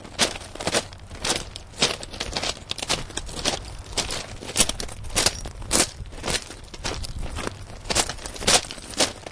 footsteps in gravel 1 LOOP
footsteps, gravel, loop